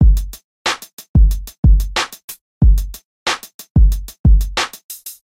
Hip Hop Loop
Easy Hip Hop/Rap Loop